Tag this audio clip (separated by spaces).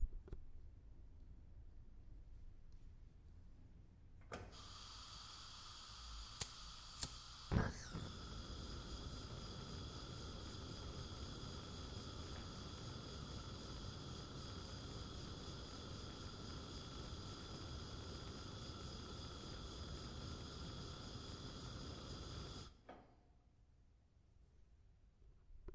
gas ignition flame stove lighter